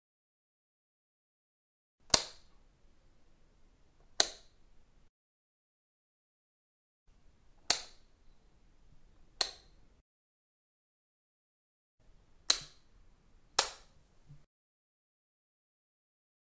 19 light switch
switching the light on and off
CZ, Czech, Panska, light, off, office, swtich